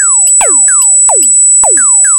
110 bpm FM Rhythm -12
A rhythmic loop created with an ensemble from the Reaktor
User Library. This loop has a nice electro feel and the typical higher
frequency bell like content of frequency modulation. Very high
frequencies make this loop rather experimental. The tempo is 110 bpm and it lasts 1 measure 4/4. Mastered within Cubase SX and Wavelab using several plugins.